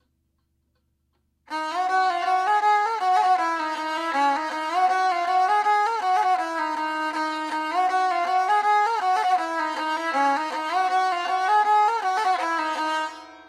Sarangi is a traditional instrument held upright and bowed across. Ambient/Sympathetic Stings to give a natural reverb.
From the Dhol Foundation Archive - Enjoy
Indian, Sarangi, Skin, Tuned, Violin